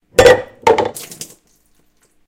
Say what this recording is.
Spilling the water/coffee
A cup tipped over, spilling water/coffee. Recorded with a Blue Yeti.
water, liquid